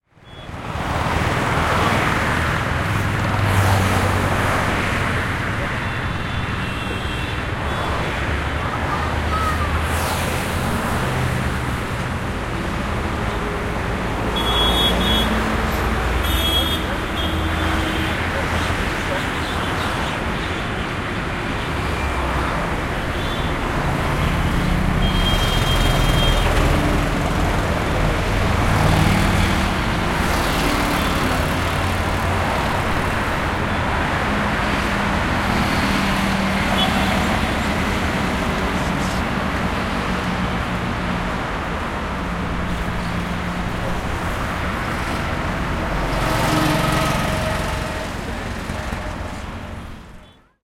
Traffic ambience recorded at Haridwar, India. Indistinct children and people chatter also can be heard.